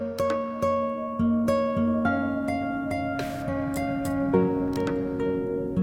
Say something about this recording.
Music sound
chord, clean